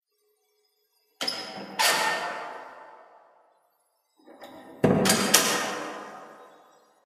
Door is opening and closing
close, closing, door, kitchen, open, opening